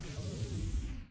Space Door Slide Close
Spaceship door closing I created combining a deotorant can and canola oil can spraying with a pitch shift.
door, foley, Space, scifi, door-close